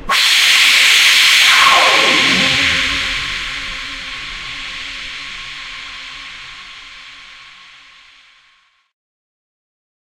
A loud synthesized scary blood-curdling scream. Part of my screams pack.
atmosphere, dark, electronic, fear, howl, noise, pain, processed, scream, synth, voice